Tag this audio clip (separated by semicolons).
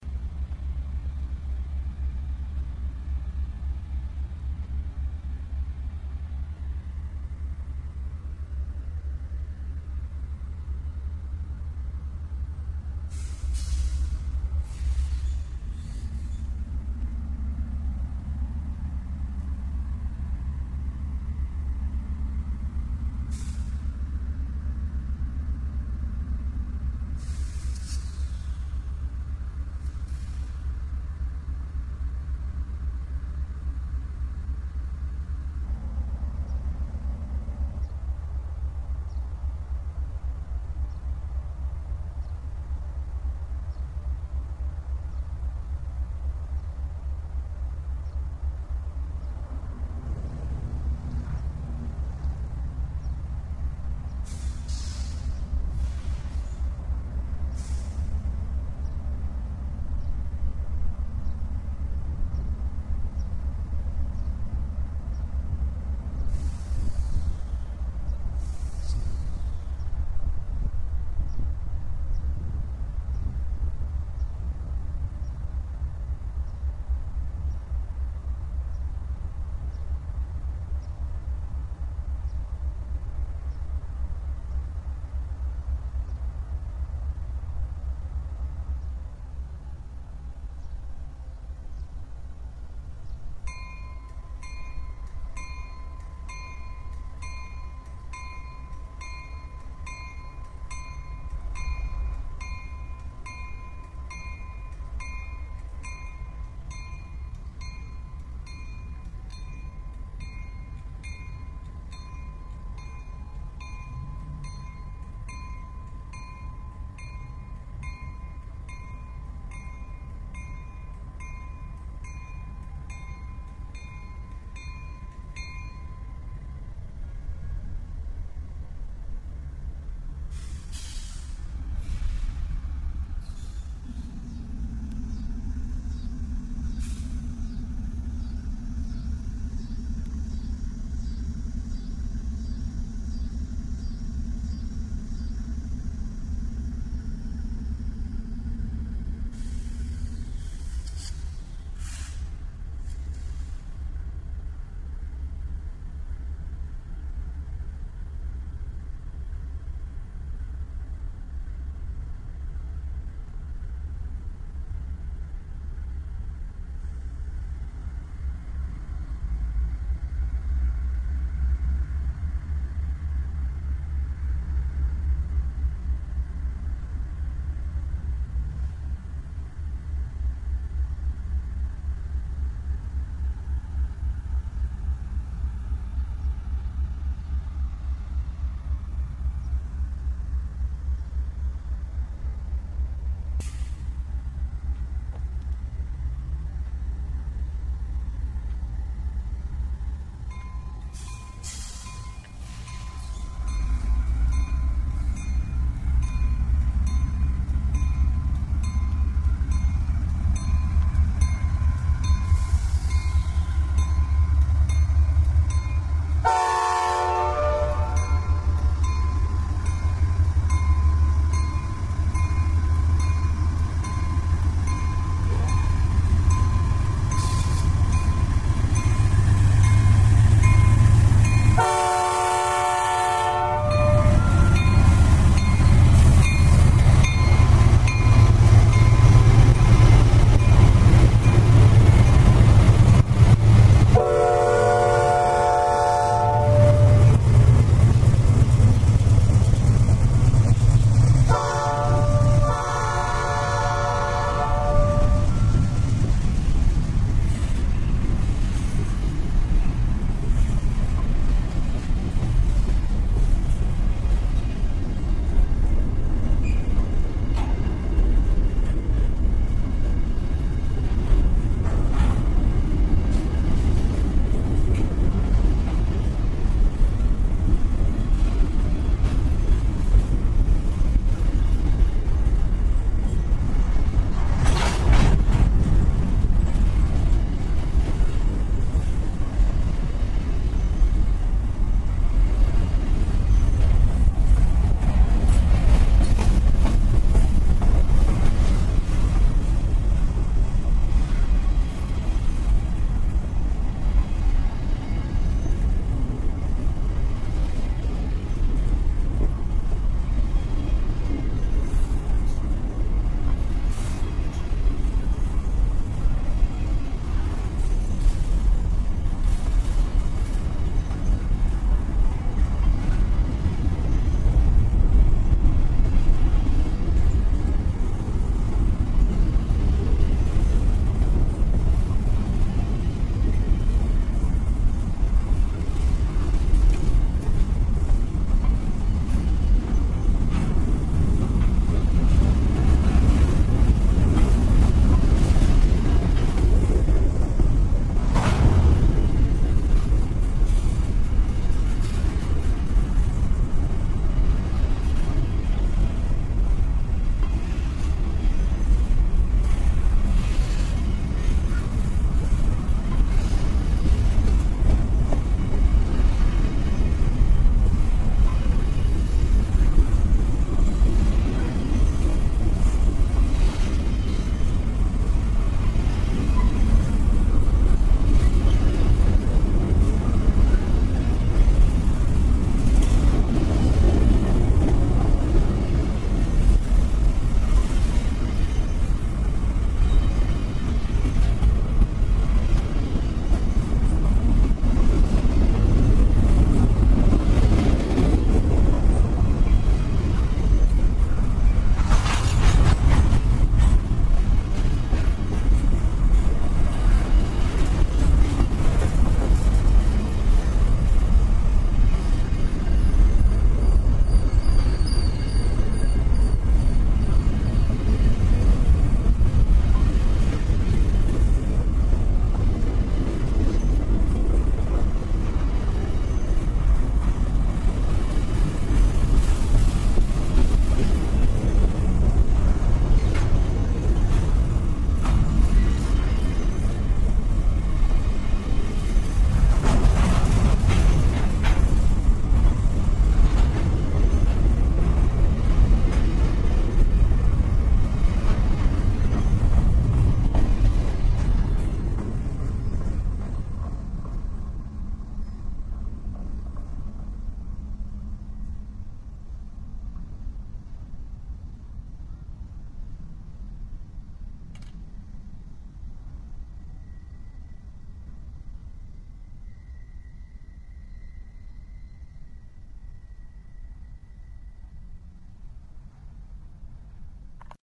diesel-locomotive; railroad; train